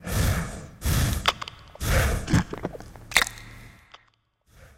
One of the "Bull" sounds I used in one play in my theatre.